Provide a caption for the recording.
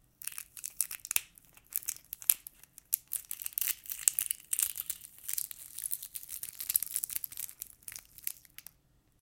oeuf.ecrase 01
eggs, crack, crackle, organic, biologic